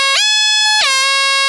Party Whistle
All sounds in this pack were made using a hand soldered synthesiser built in a workshop called DIRTY ELECTRONICS. The sounds are named as they are because there are 98 of them. They are all electronic, so sorry if "Budgie Flying Into The Sun" wasn't what you thought it was.
Make use of these sounds how you please, drop me message if you found any particularly useful and want to share what you created.
Enjoy.